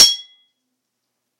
Sword Clash (55)
This sound was recorded with an iPod touch (5th gen)
The sound you hear is actually just a couple of large kitchen spatulas clashing together
clank, hit, impact, slash, stainless, struck